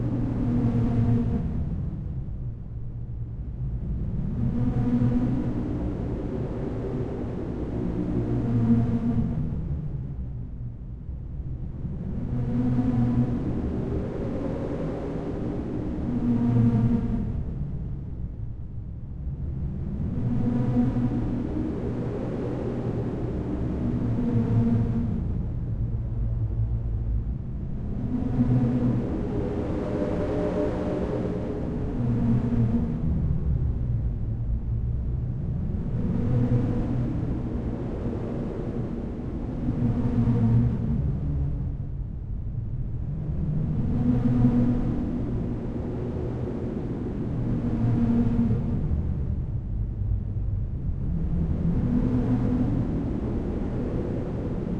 Wavy Engine Sound
A sound for a science fiction background or for a trance/electronic track. It is a slowed down, distorted recording of a high-speed machine with other rackets inside.
Ambiance, Ambient, Background, Electronic, Fiction, Futuristic, Science, Space, Starship, Strange, Trance, Wave